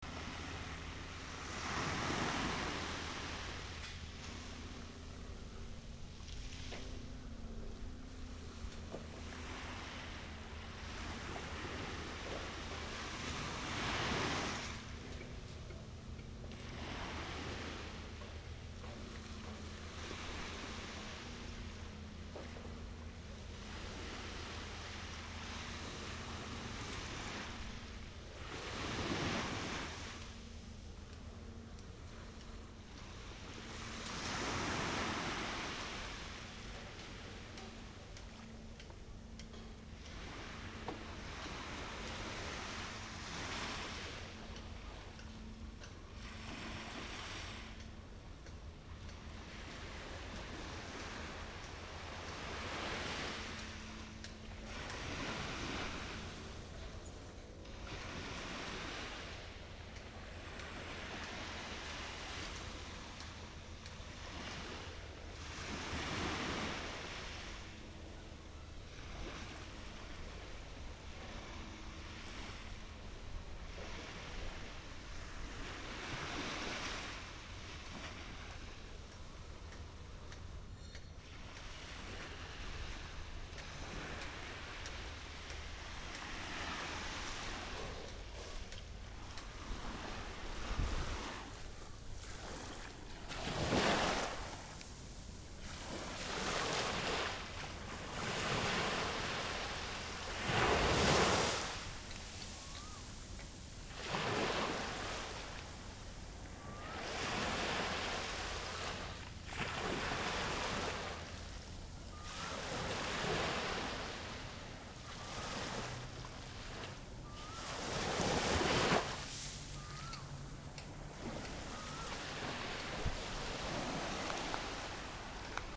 OceanGeorgetownm Penang
Waves in Hue, Vienam. Atmosphere recording. Long, but can be shortened by editing, try Audacity.